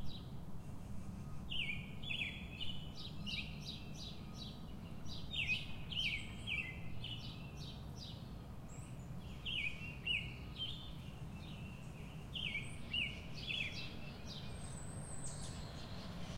Bird Singing-08
Sound of birds singing outside my window on a Tuesday morning in Virginia. Recorded with a Tuscan DR-40
birds, birdsong, morning, morning-sounds, traffic, Virginia